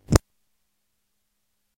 Mic off
This is a simple "click" that you hear when you turn of a microphone connected directly to an amp etc.